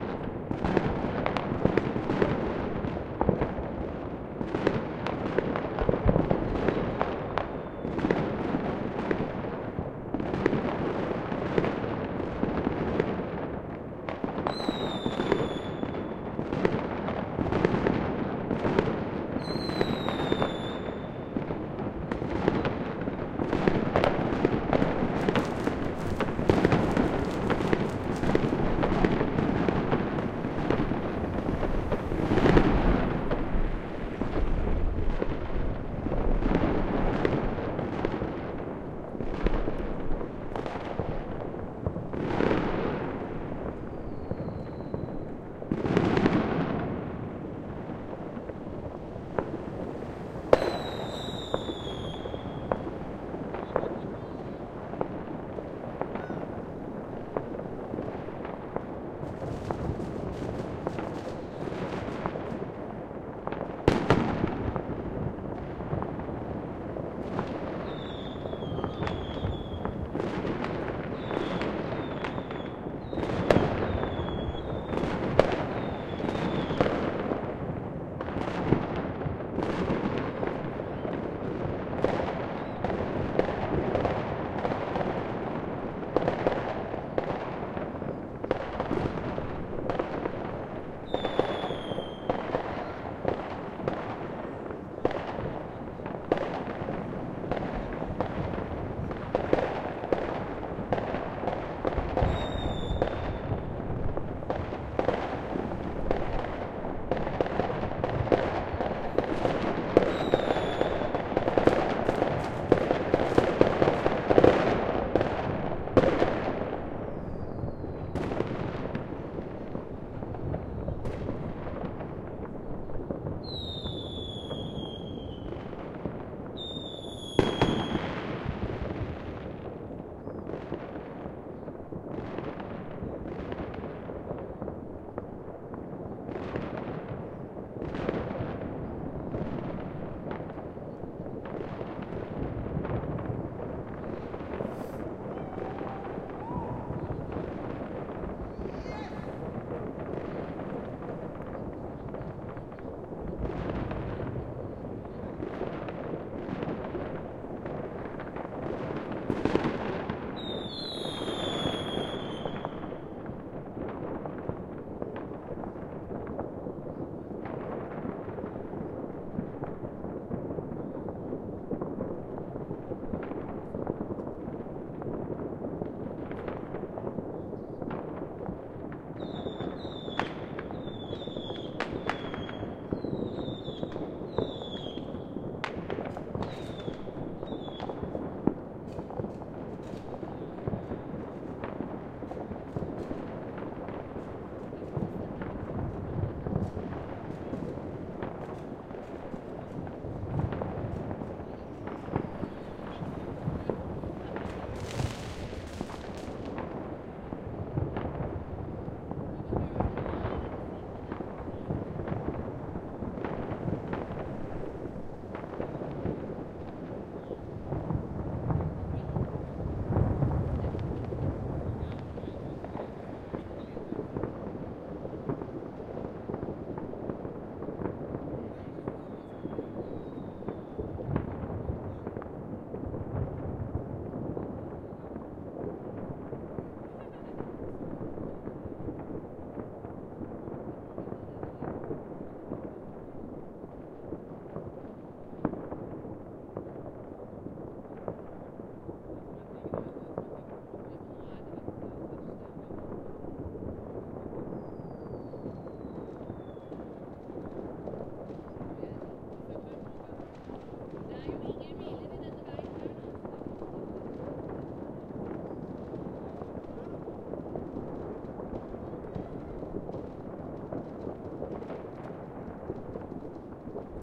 New Years Eve Oslo 2008:9
Fireworks "New Years Eve" Silence 4.33
2009, a, eve, h4, made, new, recorder, recording, year, zoom